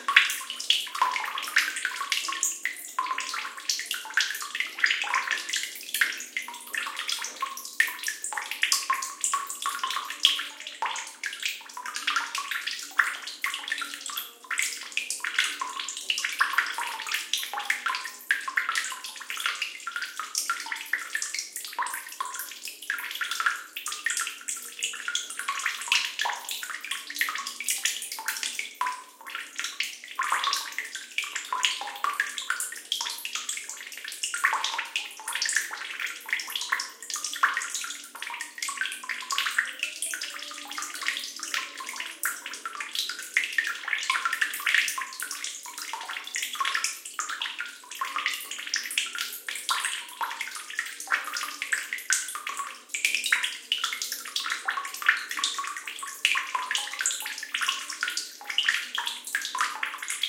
20110924 dripping.stereo.01
dripping sound. AT BP4025, Shure FP24 preamp, PCM M10 recorder